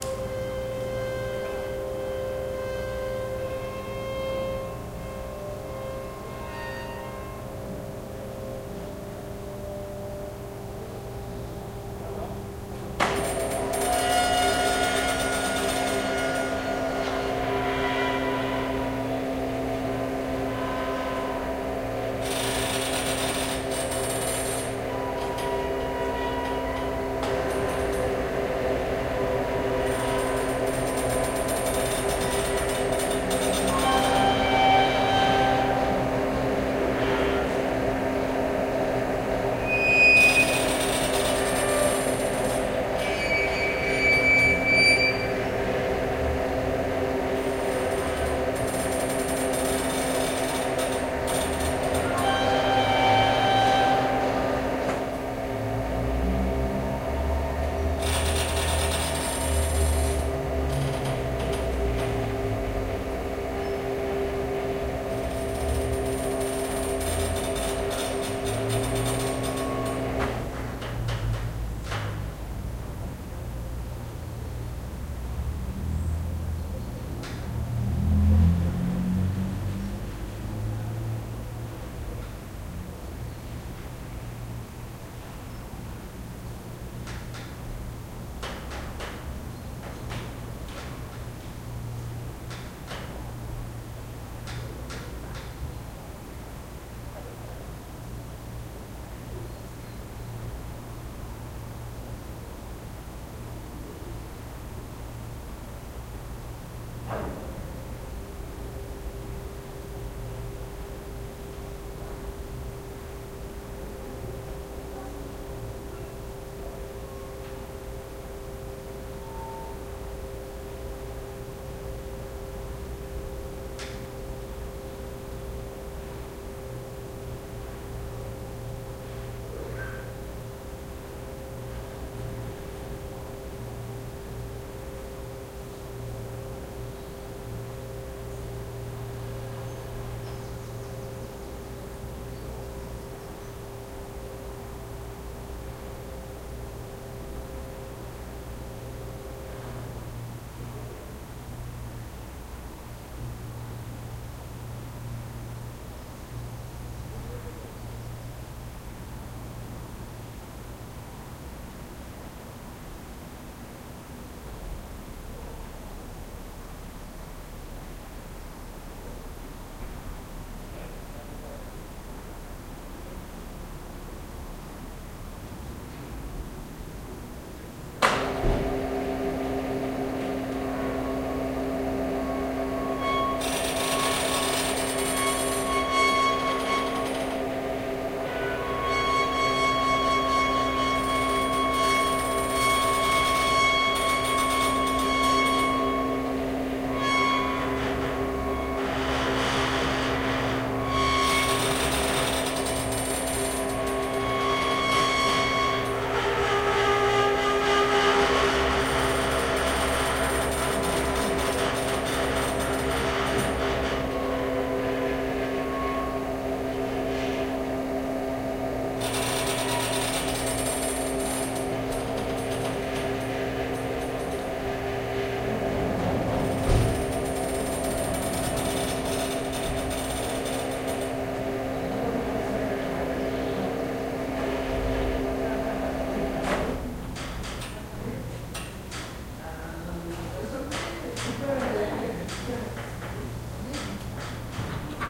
the sounds of a commercial greenhouse. the windows and sunshades are connected to electric motors by chains and the motors are connected to heat and light sensors inside the greenhouse.
two separate sets of movements here, separated by a minute or so of greenhouse ambience.
this really makes me think of a string section tuning up, although possibly a string section from hell.